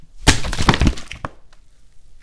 Recording of a bottle of water being thrown against my chest or into a bucket containing more bottles and water. Recorded using a Rode NT1 microphone.